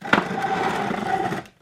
Chair-Dining Chair-Wooden-Dragged-02
This is the sound of a brick being dragged across a concrete floor. Some suggestions for alternate uses could be a for a large stone door or other such thing.
Dragged, Pull, Drag, Chair, Roar, Wooden, Concrete